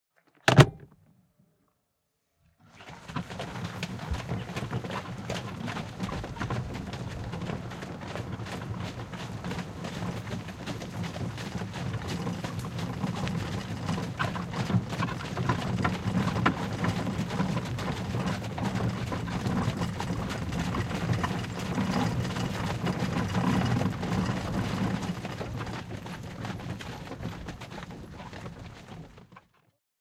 Horsewagon from 18th century